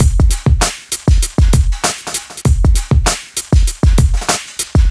Created in Hydrogen and Korg Microsampler with samples from my personal and original library.Edit on Audacity.
beat, bpm, dance, drums, edm, fills, free, groove, hydrogen, kick, korg, library, loop, pack, pattern, sample